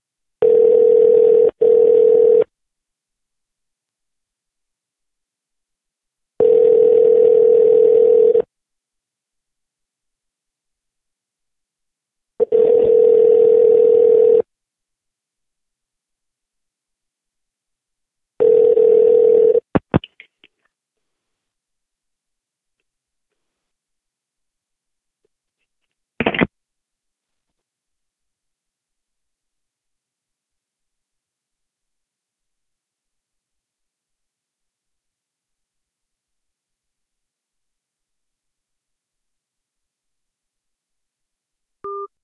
Phone rings, call receiver answers call, silence, call receiver hangs up phone.
land-line, house-phone, ringing, call, ring